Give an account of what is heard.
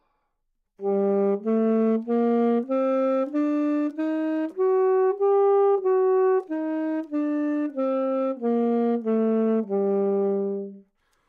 Sax Alto - G minor
Part of the Good-sounds dataset of monophonic instrumental sounds.
instrument::sax_alto
note::G
good-sounds-id::6856
mode::natural minor
Gminor, sax, scale